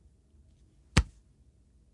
A punch to the face